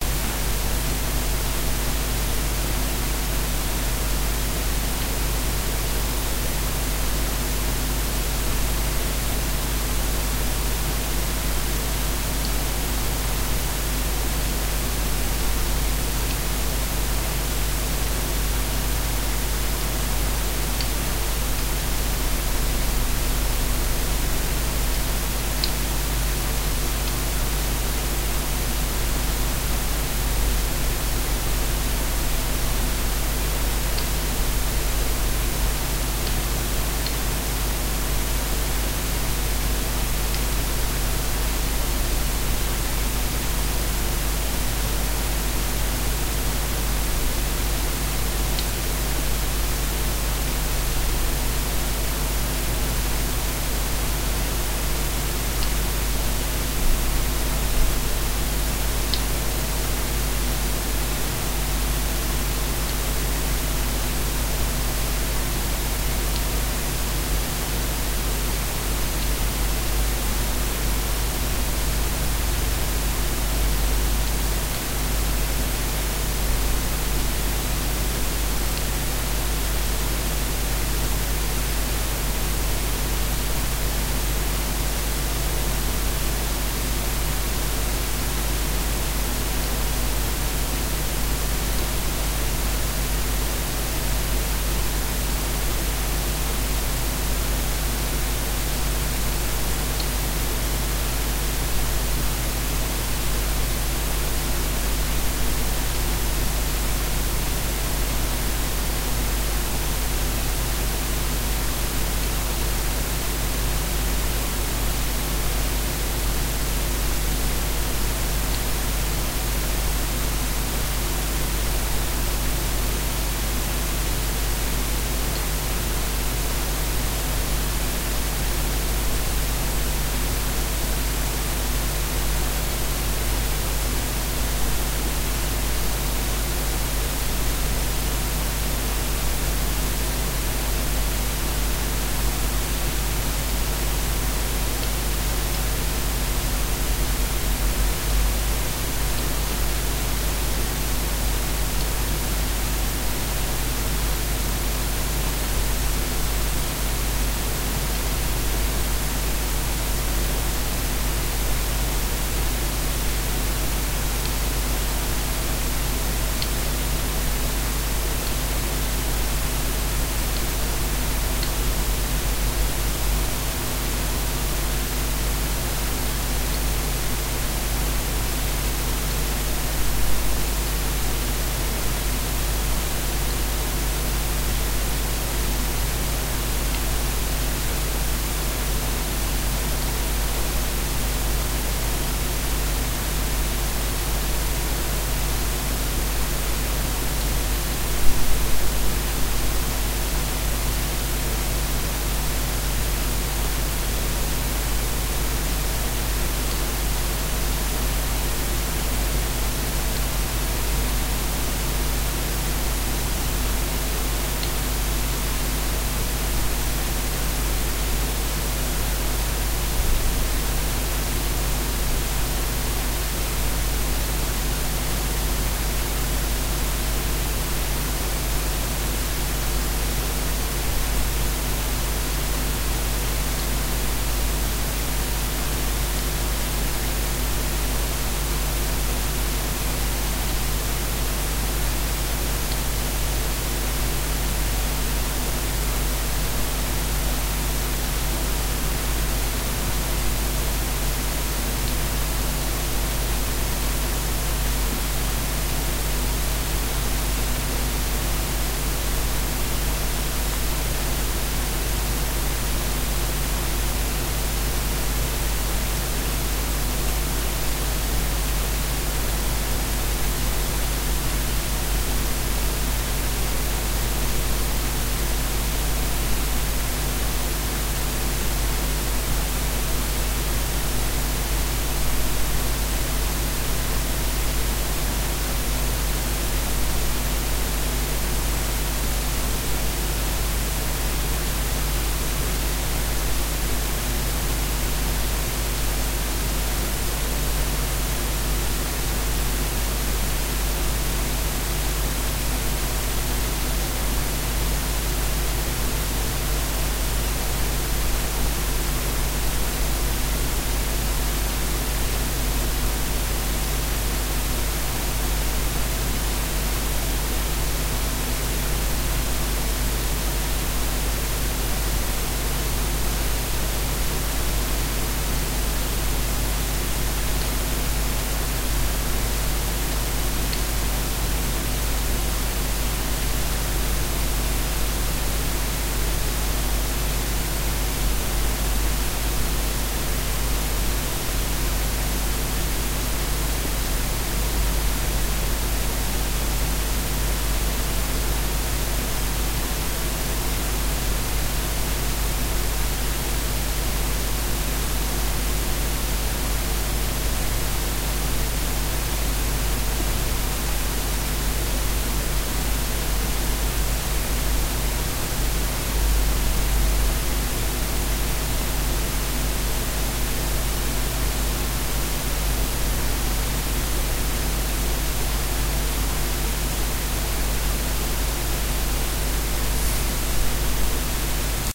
ECU-(A-XX)124+
Engine Control Unit ECU UTV ATV Running Trail Path Channel Battery Jitter Broadband Wideband Dual Carb Atmosphere Water Pression Enthalpy Entropy Fluid Rheology Power Controller Analyzer Process Fraser Synchronous
Analyzer, Atmosphere, ATV, Battery, Broadband, Carb, Channel, Control, Controller, Dual, ECU, Engine, Enthalpy, Entropy, Fluid, Fraser, Jitter, Path, Power, Pression, Process, Rheology, Running, Synchronous, Trail, Unit, UTV, Water, Wideband